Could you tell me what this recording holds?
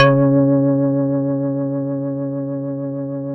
House Organ C#3
A multisampled house organ created on a shruthi 1 4pm edition. Use for whatever you want! I can't put loop points in the files, so that's up to you unfortunatel